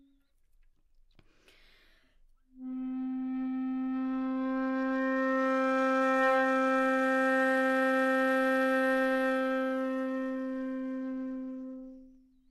Flute - C4 - bad-dynamics
Part of the Good-sounds dataset of monophonic instrumental sounds.
instrument::flute
note::C
octave::4
midi note::48
good-sounds-id::202
Intentionally played as an example of bad-dynamics
C4, flute, good-sounds, multisample, neumann-U87, single-note